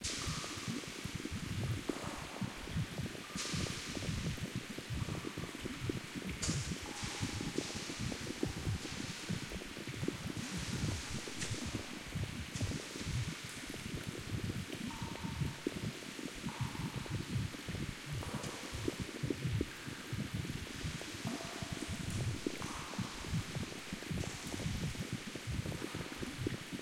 Note: audio quality is always better when downloaded.
The atmosphere of a cave with hot and not so hot springs. The sound is looped back. This atmosphere is mixed from six separate samples. These are dry sounds of drops, two close sounds of a stream, two artificial textures imitating the sounds of bubbles (in the near and far plans) and the sounds of water evaporation on the column of a gas stove. Created with EQ, filters, panning, and convolutional reverbs. Enjoy it. If it does not bother you, share links to your work where this sound was used.